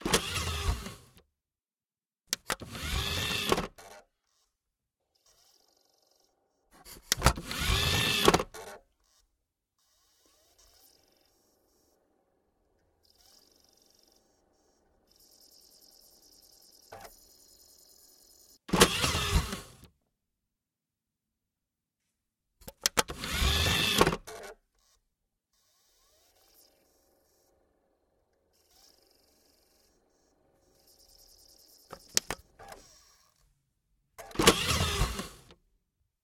20180422 Optical disc drive
CD, close, DVD, DVD-drive, onesoundperday2018, open, optical-media